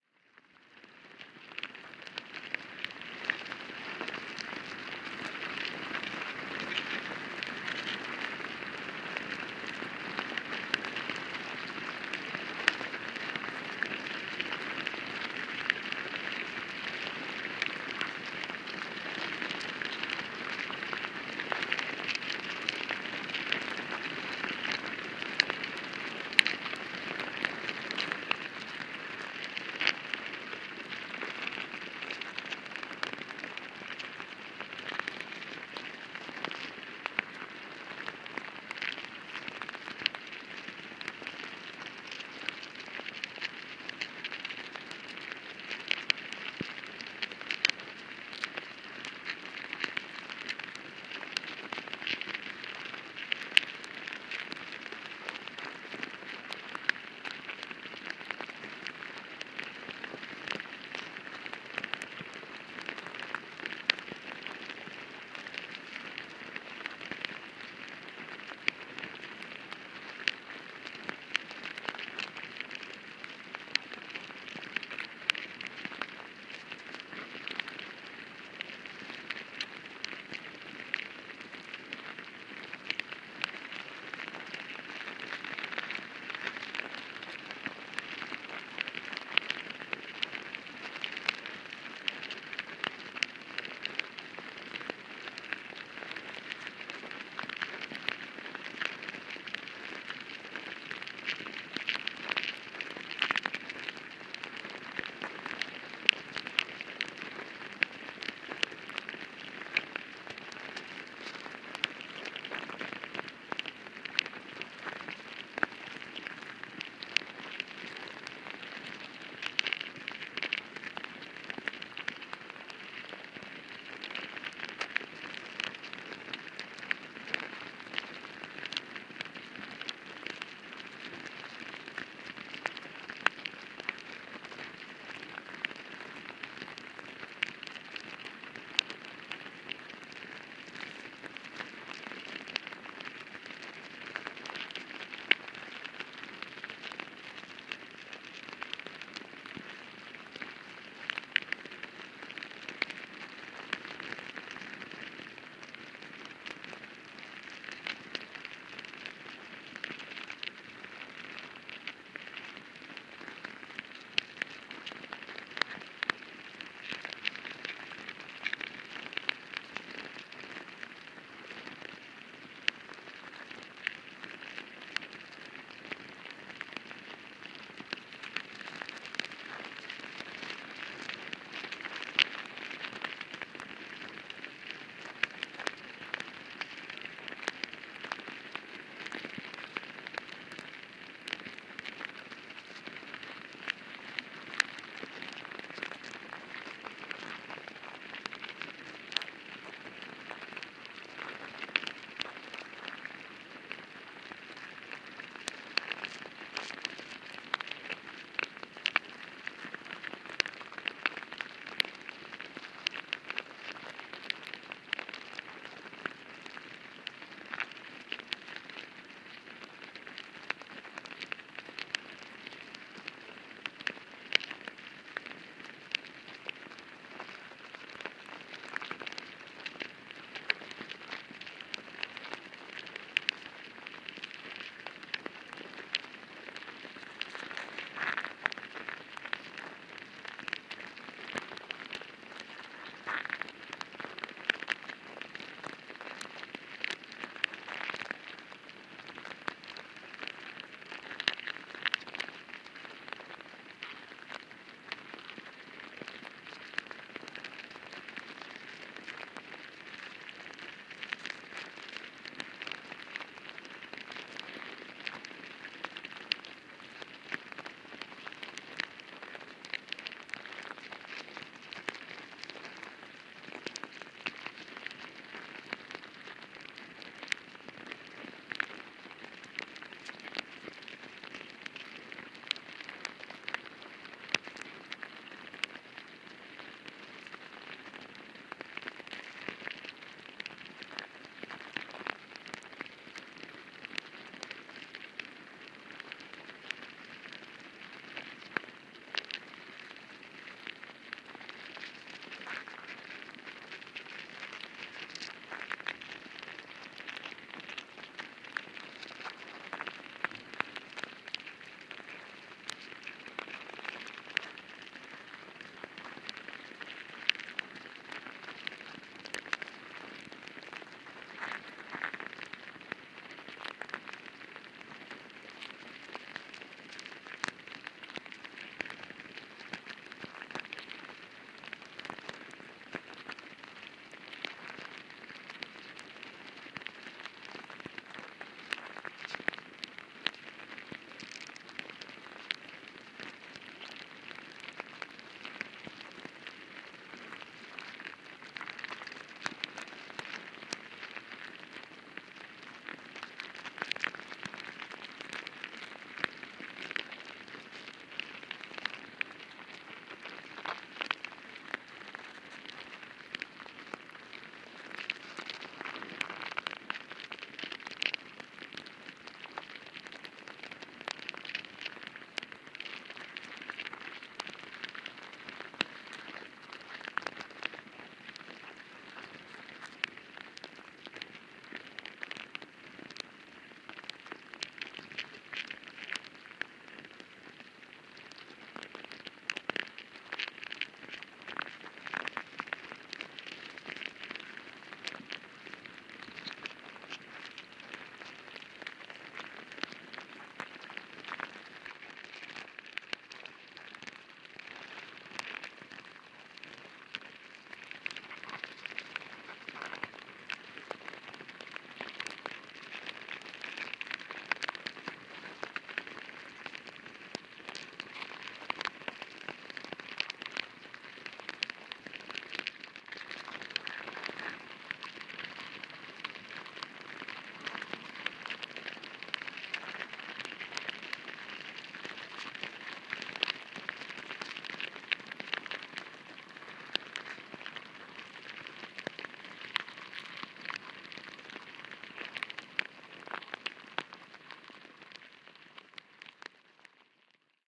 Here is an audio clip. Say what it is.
Orcas Island Ant Hill
This is a recording of the inside of an ant hill made with a Sound Devices 702 recorder and a Cold Gold Balanced Contact Microphone. I placed the mic onto the hill and pressed down slightly so that the mic was about an inch inside. After about 2 mins the mic had been pulled down another inch or two farther. Then I started to record.
Ants, nature, contactmicrophone, fieldrecording, ant-hill, wildlife